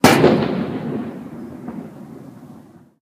Slam & Fire
The mixing together of putting a cup on a steel table next to an iPod 5 microphone and a distant firework sound amplified using Audacity. Location: The Castle, Neutral Bay, close to the wharf, Sydney, Australia, 15/04/2017, 16:56 - 21:11.
Amplified-Firework, Boom, Cannon, Cannon-Fodder, Fire, Firework, Gunshot, Table, Table-Slam